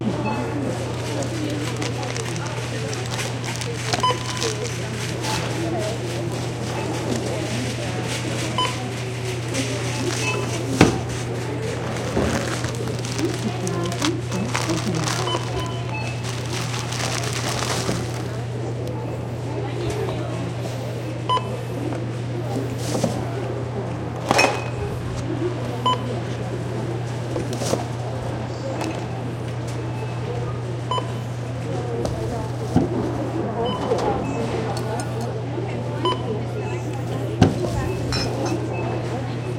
grocery store ambience 2
A clip of grocery store ambience, featuring the sound of self-service check-out.
receipt-printer-sound, cash-register